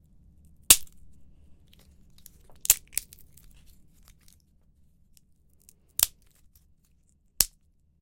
Branch Cracking
Sound clip of branches being broken / cracked done with breaking pieces of wood. Recorded in studio with a Rode ND2-A.
branch-cracking, cracking, OWI, studio-recording